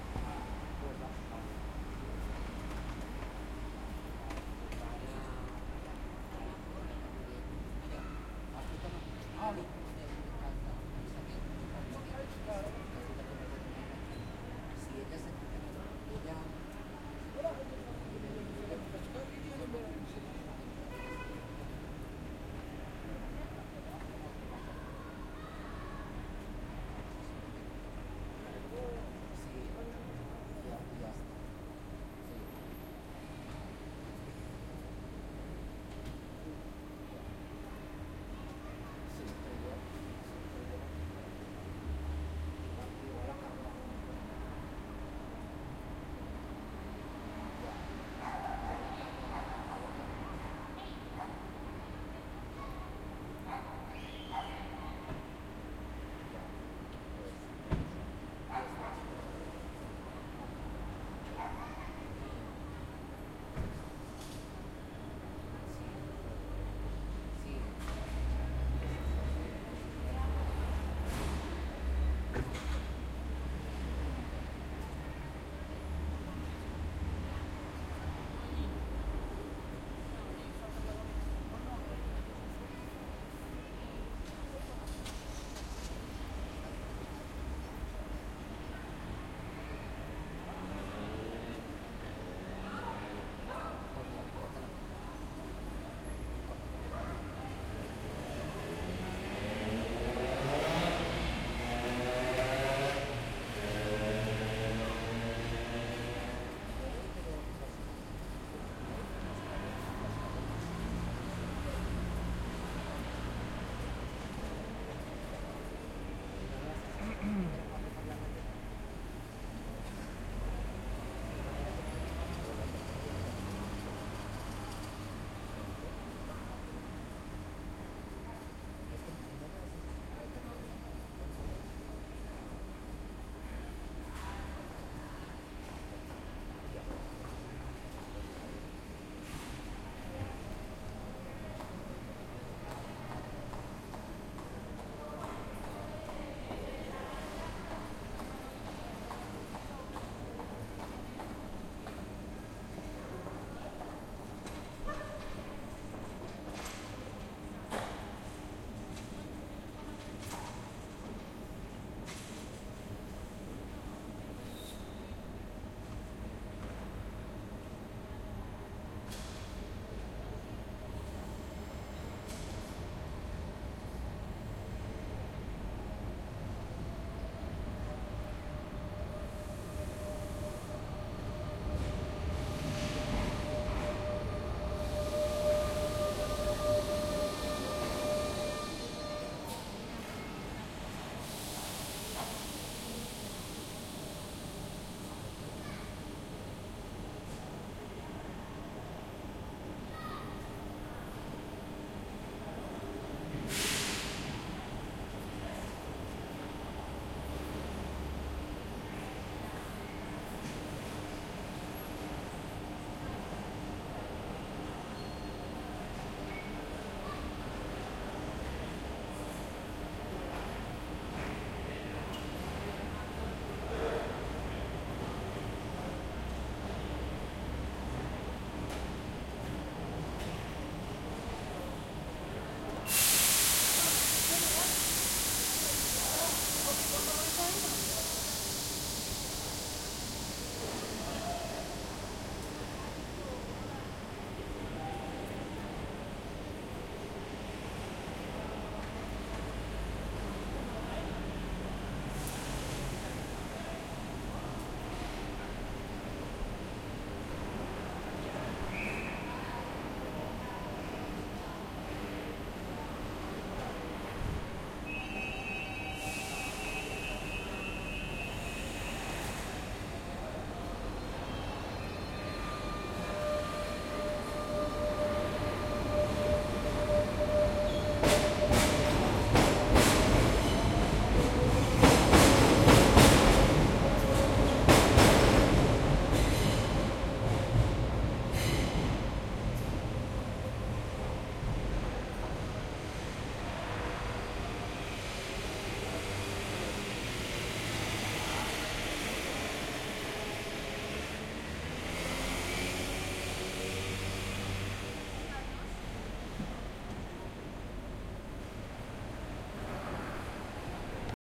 The following audios have been recorded at a bus and train station at Gandia (Valencia). They have been recorded late in the afternoon on the month of december.
movement, bus, Train, travel, travelling, walking, talking, Valencia, coach, crowd